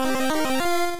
SFX-Begin 2
Another jingle I imagine would play at the beginning of a level.
8-Bit, 8Bit, Arpeggio, Game, High, NES, Pulse, SFX, Square, Video-game